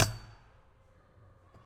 Skate Park Hitting Fence
samples, alive, recording, sampling